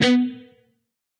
Dist sng B 2nd str pm
B (2nd) string. Palm mute.
distorted, distorted-guitar, distortion, guitar, guitar-notes, single, single-notes, strings